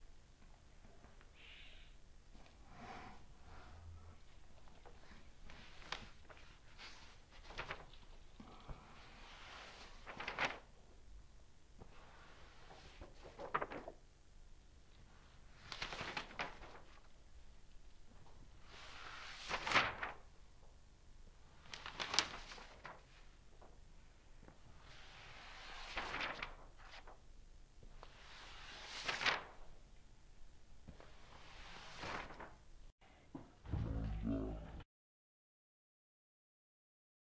pasando hojas
Pasar hojas de papel con las manos